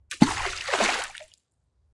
Water + 4 rocks + mixing = bigger plop! This is a mix of Ploppy_1 to 4 mixed together. Recording chain: Rode NT4 (stereo mic) - Sound Devices MixPre (mic preamp) - Edirol R09 (digital recorder).